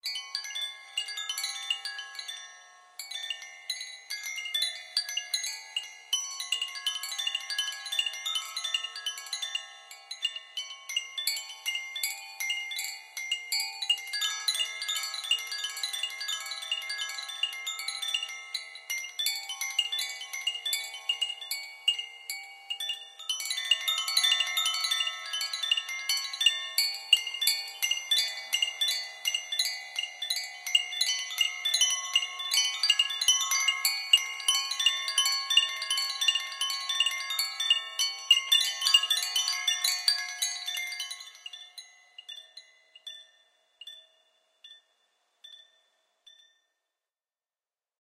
circular windchimes
recorded using Zoom H4n